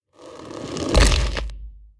Monster Step Foreleg 1/2
beast, creature, foreleg, heavy, horror, monster, run, scary, sounddesign, step, walk